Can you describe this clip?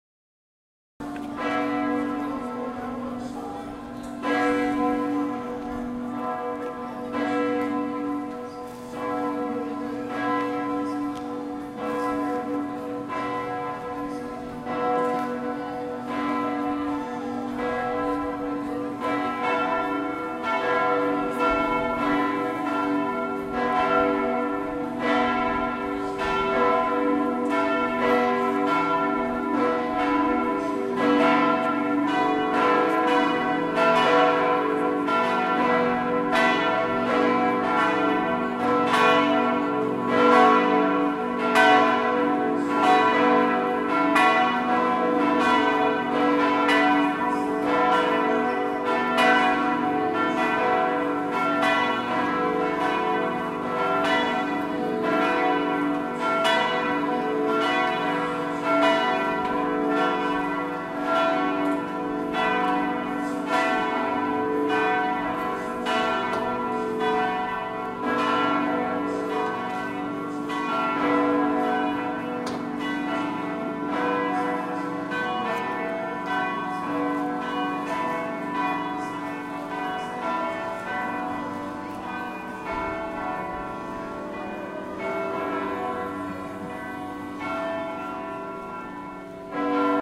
Church Bell
This sound was recorded by NoísRadio with a zoom h4n. The location is a big church in a small town call Buga, the church name is La Basilica. The sound of the bells was recorded at the morning.
cathedral, bell, buga, colombia, dong, church